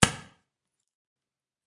Scotch tape being ripped off